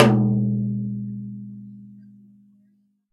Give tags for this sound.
velocity; 1-shot

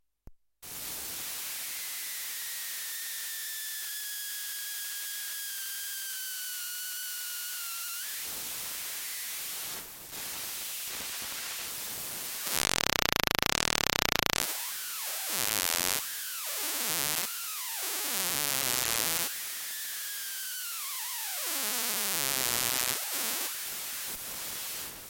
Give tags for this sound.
analog; circuit-bent; hiss; long; noise; synth

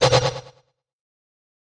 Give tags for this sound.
fx; game; jungle; pc; sfx; vicces

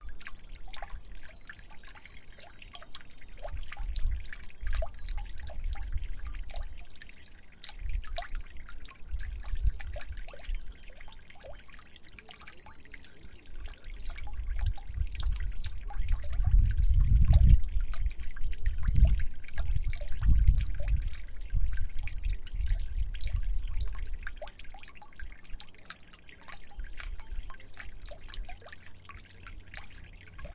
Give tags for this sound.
Drips; Drops; Drain; Splash; Movement; Water

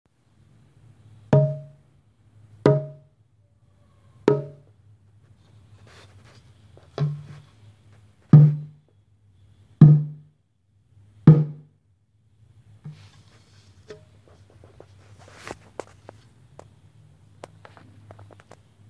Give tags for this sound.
est; Golpe; mago; sonido

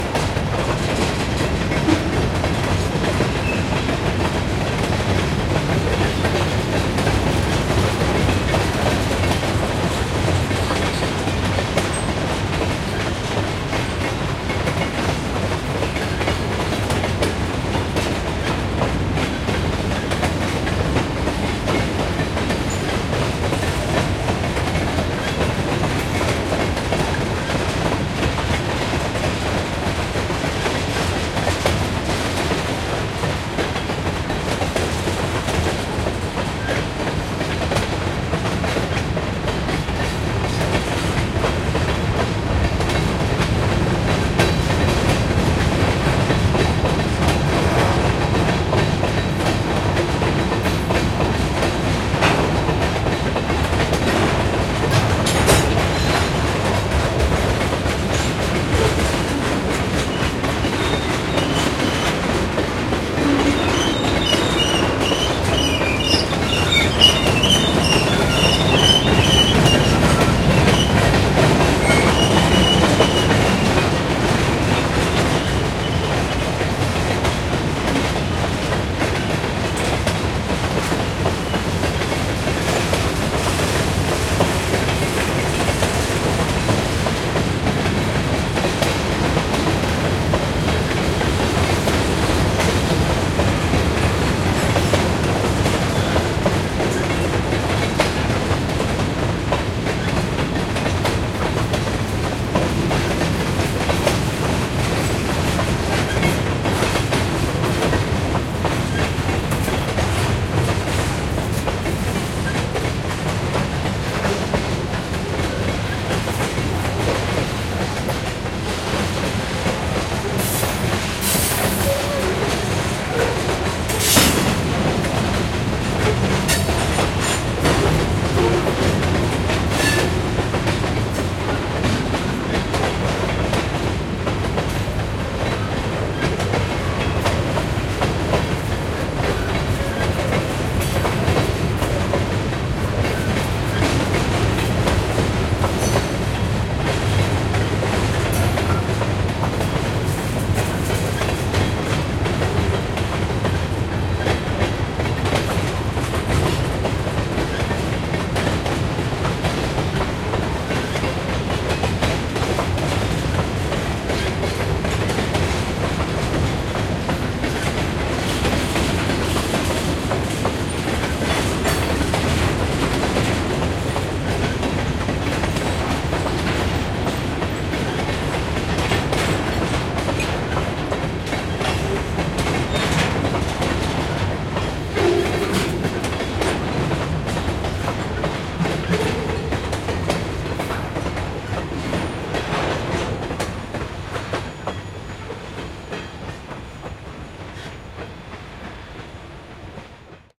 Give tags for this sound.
train; noise; industrial; field-recording

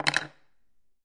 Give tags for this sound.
counter; counter-top; countertop; drop; dropped; dropping; hit; impact; knock; laminate; percussion; percussive; rattle; spoon; wood; wooden; wooden-spoon; wood-spoon

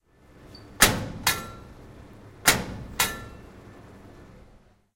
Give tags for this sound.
campus-upf; fountain; lever; noise; UPF-CS12

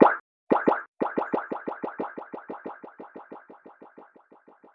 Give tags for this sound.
Deleay; Plughole; Reason; Standard; Wavelab

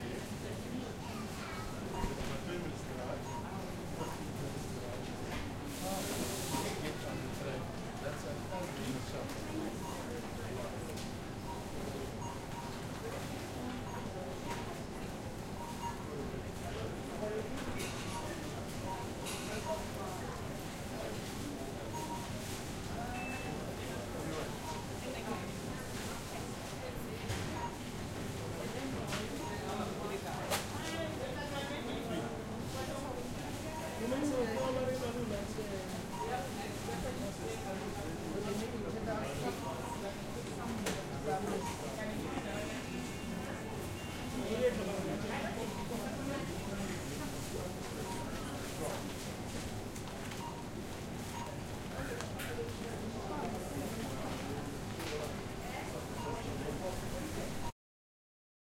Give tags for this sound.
buying; OWI; pay; paying; people; shopping; store; supermarket; till; tills

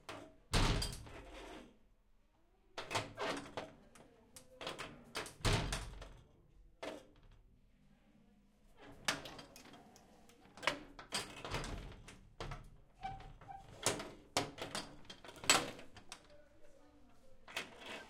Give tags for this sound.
heavy; metal; close; unlatch; rattle; door; open

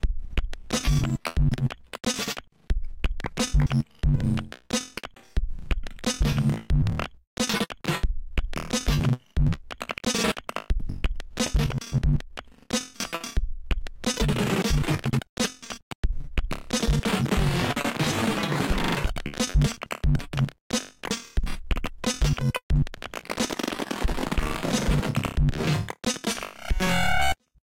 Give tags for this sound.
bass; beat; click; drum; electronic; glitch; snare; sound-design; weird

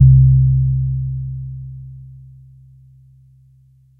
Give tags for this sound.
electric-piano; multisample; reaktor

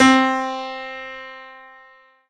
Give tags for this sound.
Upright-Piano; Keys; Piano; Grand-Piano

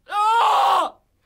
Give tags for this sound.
666moviescreams; Gritando; Grito; Pain

